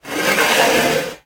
Chair-Folding Chair-Metal-Dragged-03
The sound of a metal folding chair being dragged across a concrete floor. It may make a good base or sweetener for a monster roar as well.
Chair, Concrete, Drag, Dragged, Metal, Pull, Pulled, Push, Pushed, Roar